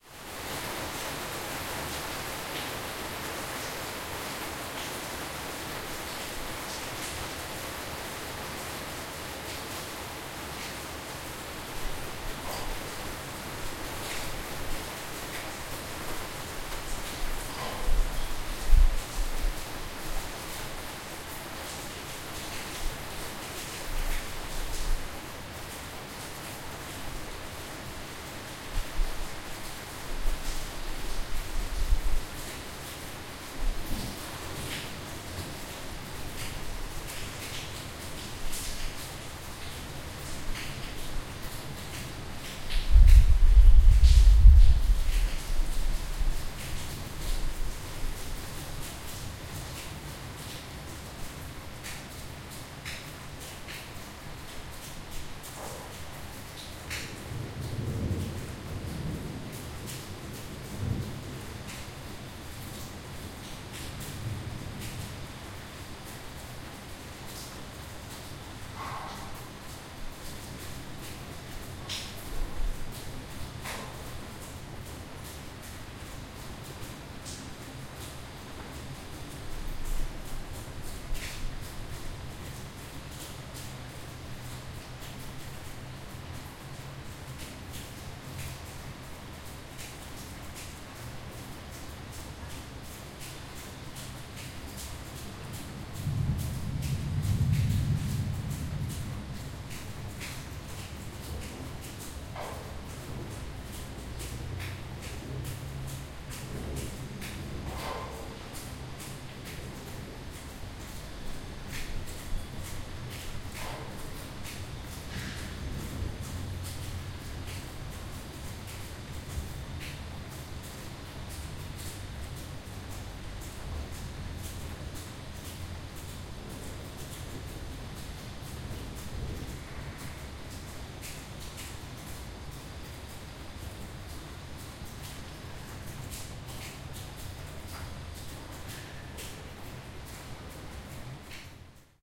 My field recording of the rain at my house in Bangkok, Thailand, but then the rain began to stop. You can are also hear some building construction sound slightly in the background.
Recorded with Zoom H1.
bangkok, construction, rain, raining